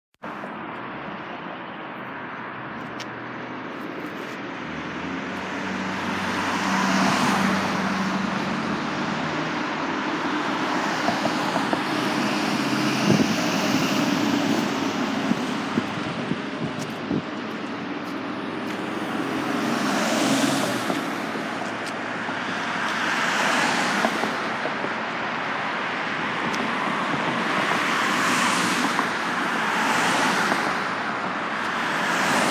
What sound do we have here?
Cars Driving by as I stand on the sidewalk in the middle of the two lanes
Cars, Fast, Road